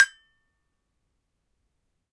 Sample pack of an Indonesian toy gamelan metallophone recorded with Zoom H1.